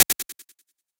cooledit auditorium
2nd set of impulse responses created in Cool Edit 96 with the "echo", "delay", "echo chamber", and "reverb" effect presets. I created a quick burst of white noise and then applied the effects. I normalized them under 0db so you may want to normalize hotter if you want.